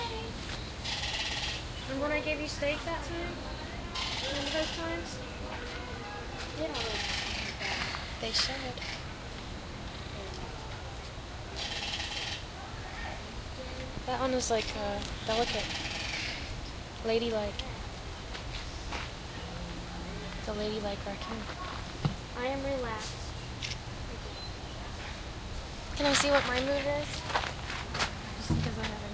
Mood ring action recorded at Busch Wildlife Sanctuary with Olympus DS-40.
field-recording, nature, mood-ring, ambient